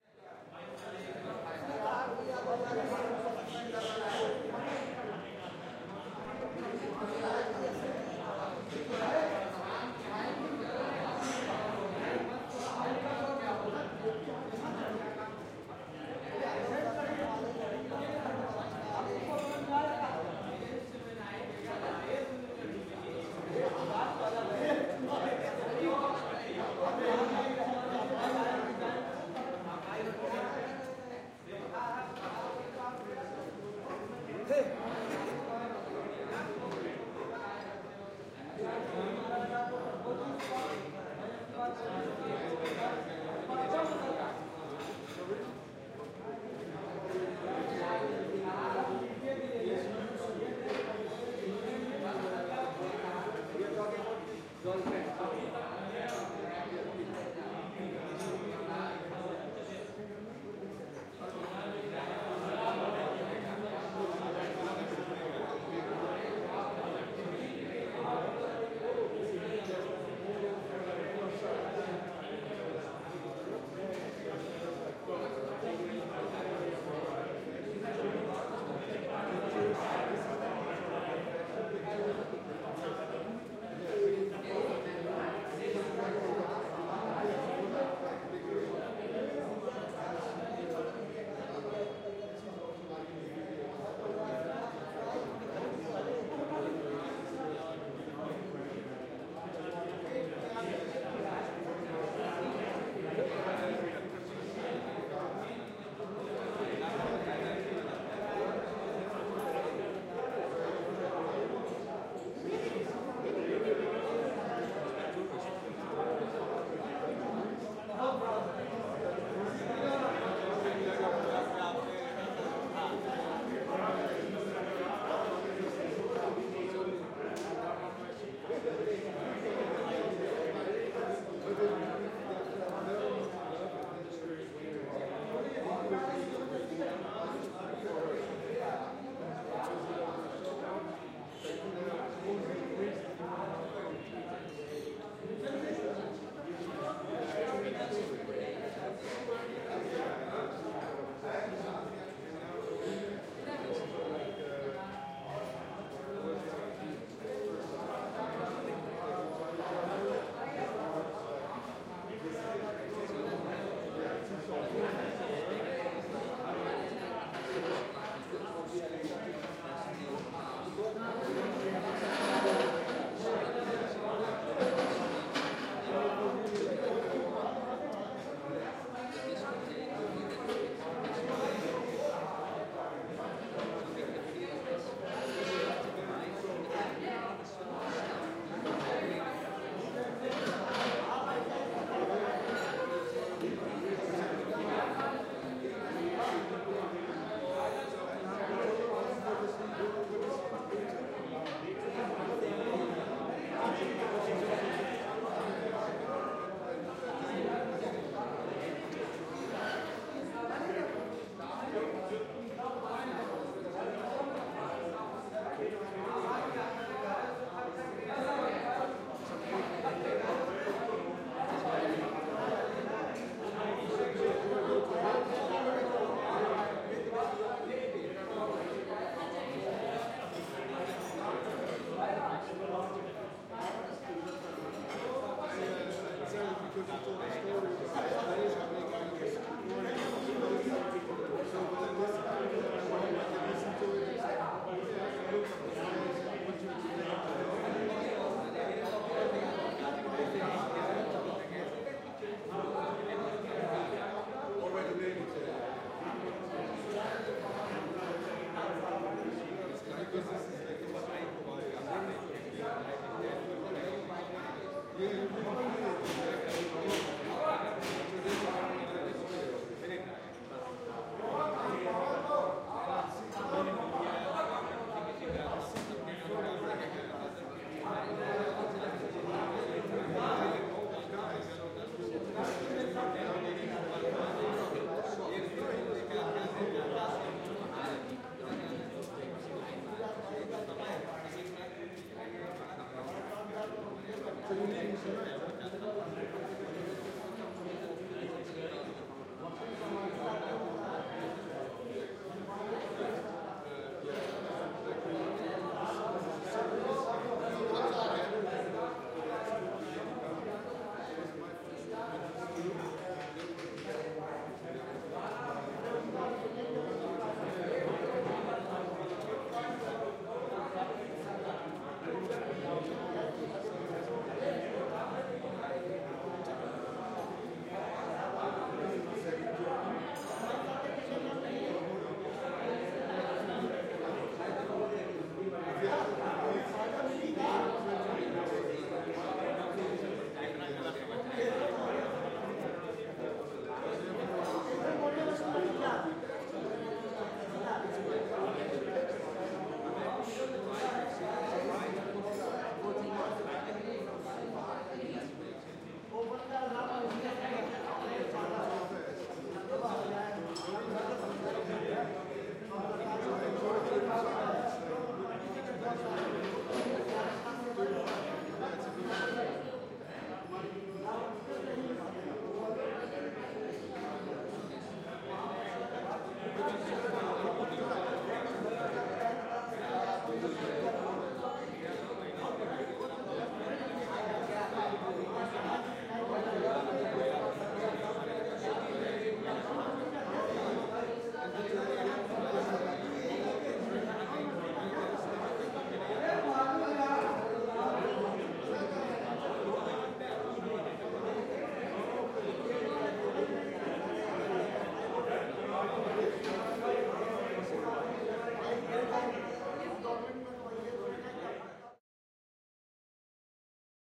Indian Coffeehouse (M/S stereo)